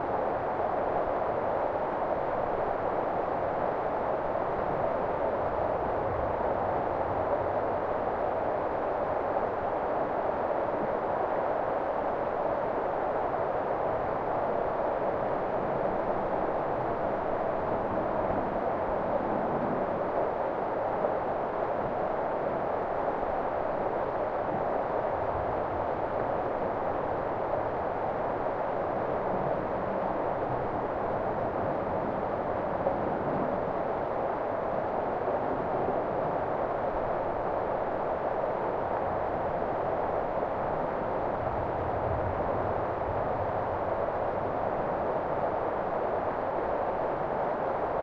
Rain from Indoors - Perfect loop
A perfect loop of rain, EQ'd to sound like it was recorded from indoors.